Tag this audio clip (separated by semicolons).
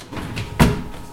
industrial; machine; mechanical